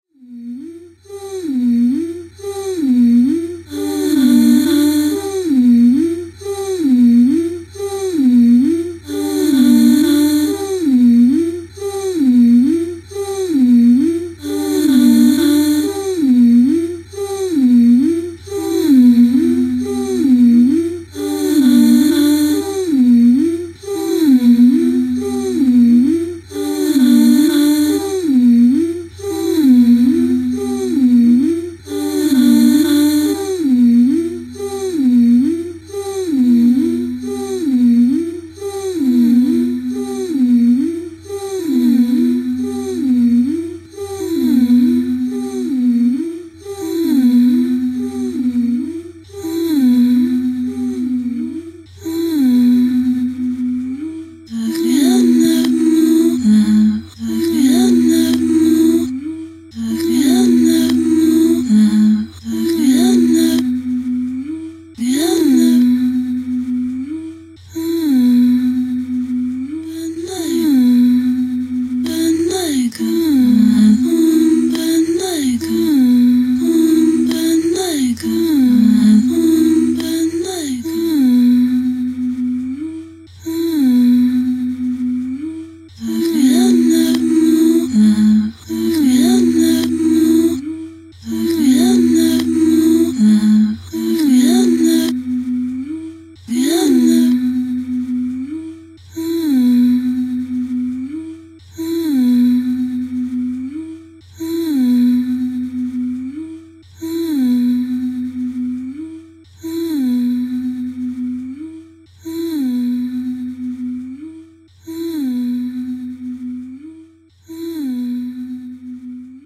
female vocal cut ups collage
parts of an a cappella cut up and rearranged, duplicated, reveresed some parts, glued and layered everything
polyphonic, ups, experimental, samples, layered-vocals, singing, voice, vocals, cut, vocal, female